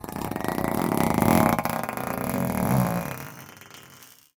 BS Zip 8
metallic effects using a bench vise fixed sawblade and some tools to hit, bend, manipulate.
Metal, Buzz, Grind, Rub, Scratch, Zip